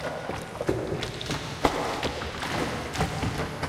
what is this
Shaking a bucket with water. Added som reverb. Made to fit as a loop. ZOOM H1.
field-recording, indoor, loop, soundscape, water